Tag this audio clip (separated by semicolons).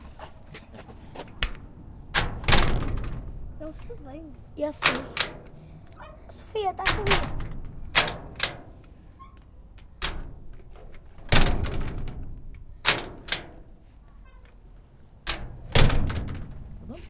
Escola-Basica-Gualtar; sonic-snap